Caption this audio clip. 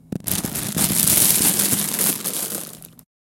cheezits Mixdown 1
A recording of a cheezit being removed from a bag, edited to sound like rocks being poured in a pile